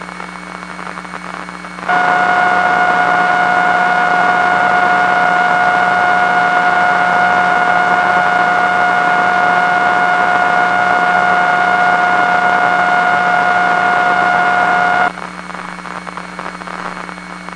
Computer noise, webcam comes on, Ham radio 146.67 MHZ
EMI from my desktop computer recorded from Line-in from a 40-year-old Icom IC230 2-meter FM ham transceiver at 146.67 MHZ. Lifecam HD3000 webcam goes active at 00:02 heterodyning with the noise from the CPU.
2-meters, amateur-radio, beep, computer, desktop, digital, electro-magnetic, electronic, EMF, EMI, glitch, ham, heterodyne, hum, interference, noise, radio-interference, tone, vhf, webcam, whine